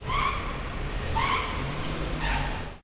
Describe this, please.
Triple wheel drift sound
Sound of a car getting out of a car park with their wheels making drift noise with the floor in big car park (noisy and reverberant ambience).